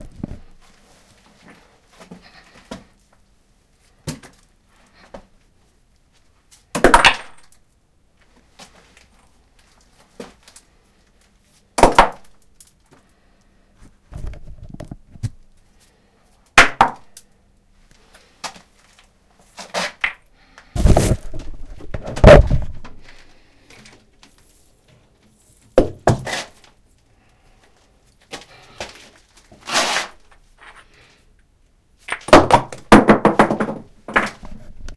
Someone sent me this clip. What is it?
more throwing
another recording of a rock or stone being thrown in a london house (outside)